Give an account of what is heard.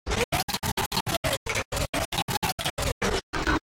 "gated sound" noise